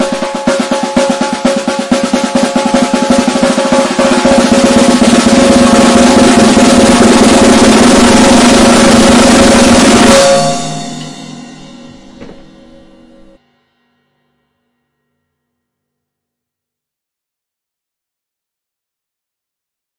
10 Second Drum Roll with Cymbal Accent
Sound ID is: 592687
accent, ceremony, cymbal, drum, drum-roll, percussion, roll, snare, Sonic